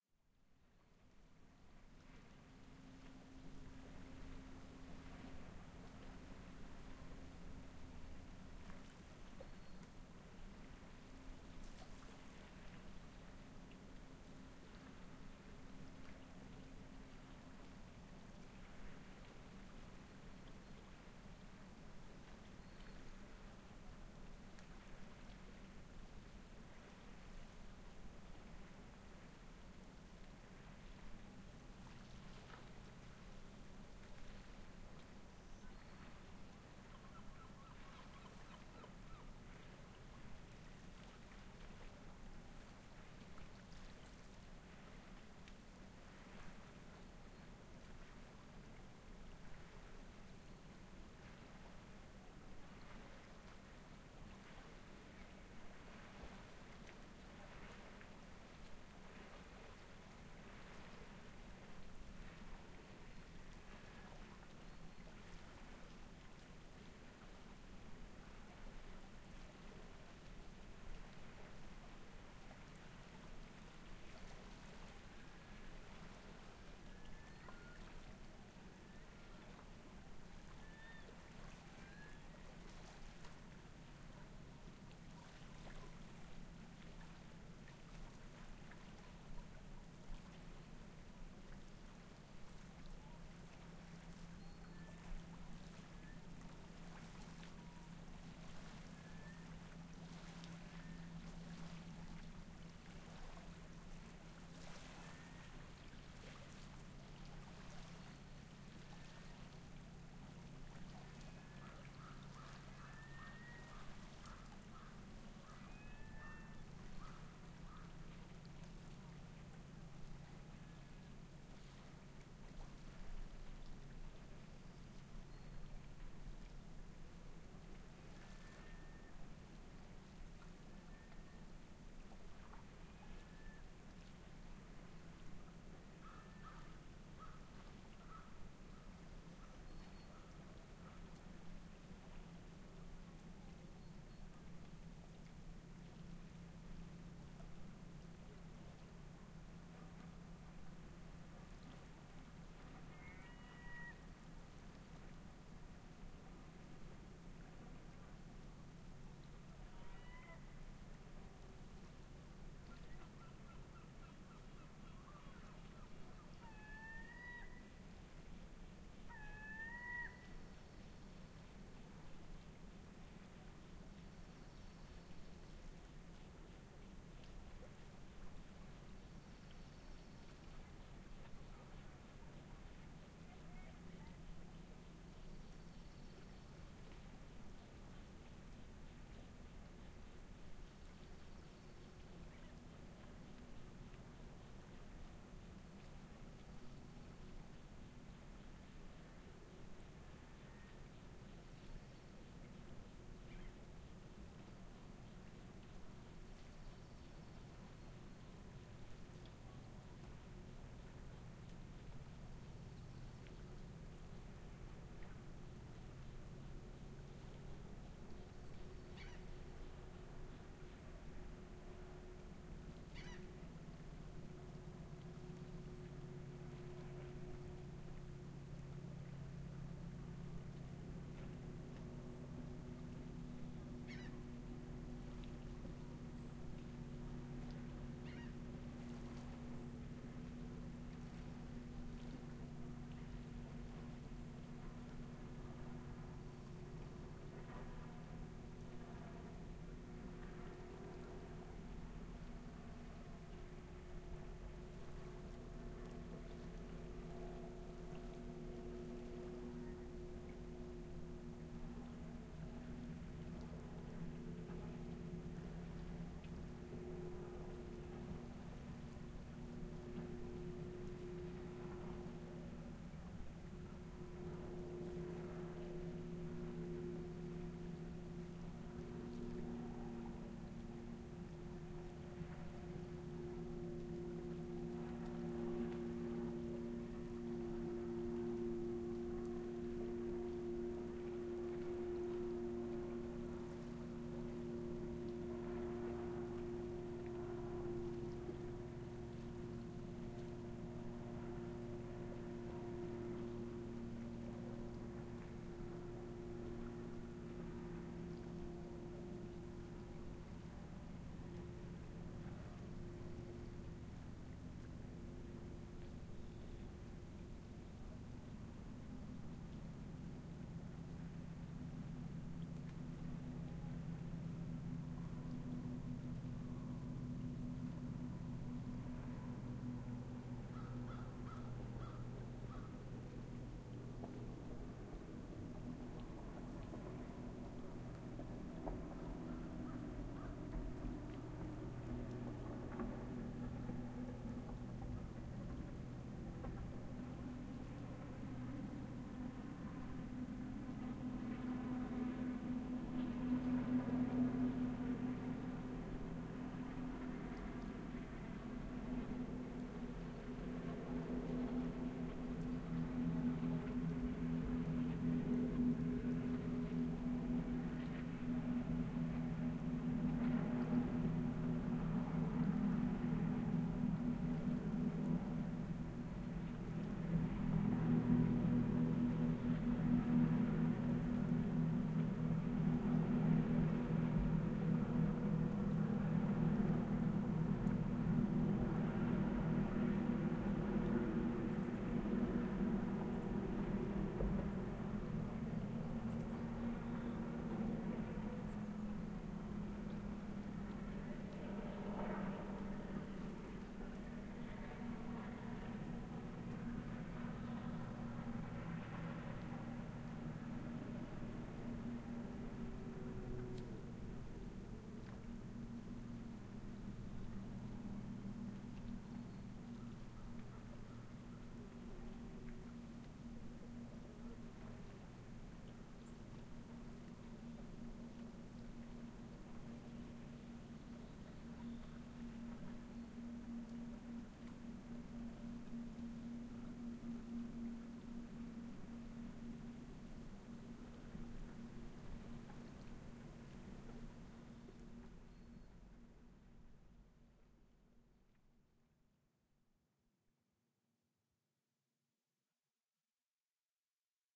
09 Gentle Waves Seagulls Boats 44 16
Ambient sound of gentle waves on a rocky beach with seagulls and boats in the background.